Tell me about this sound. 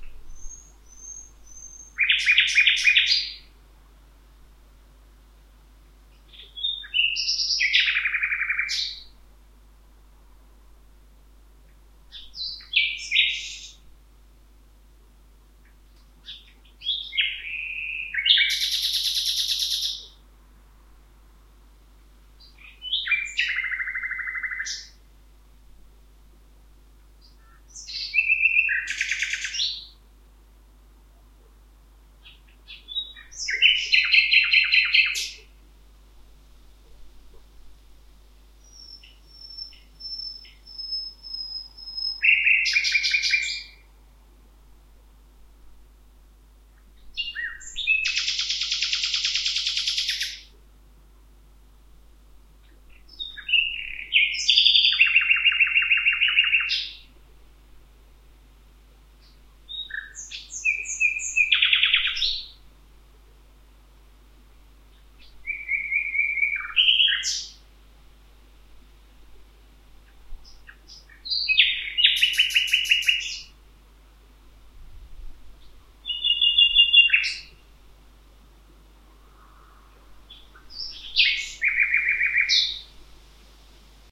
one birds song in wild